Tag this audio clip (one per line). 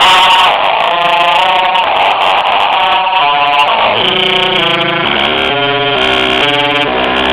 industrial
cold
effect
harsh